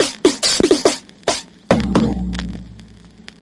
wafb fill acoustic 70 airlacquer
acoustic fills sound-effect
acoustic, fills, sound-effect